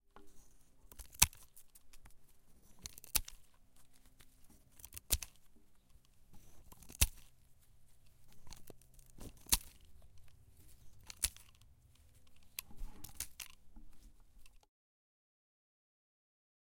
1. Cutting a bush with scissors
Dry bush, garden scissors, outside, close
Pansk, Czech